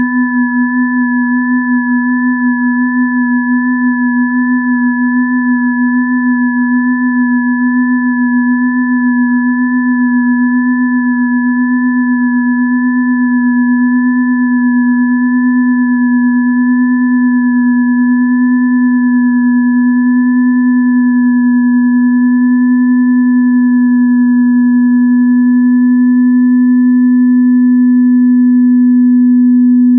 Long stereo sine wave intended as a bell pad created with Cool Edit. File name indicates pitch/octave.
synth, multisample, pad